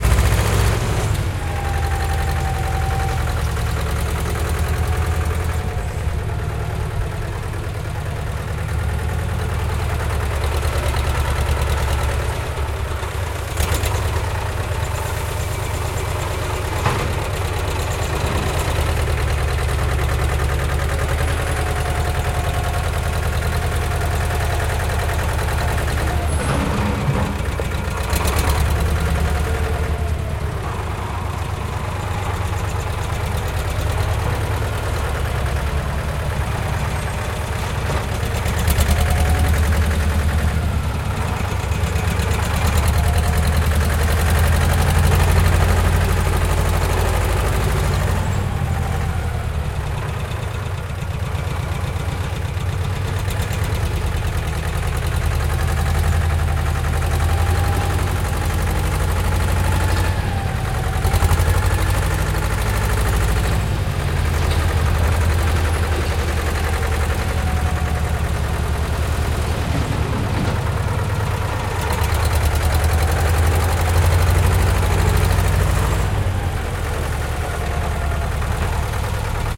Construction Bulldozer Diesel
Bulldozer recorded with a Zoom H4N internal Microphones plus Sanken CS-3
Bulldozer, Construction, Diesel, Engine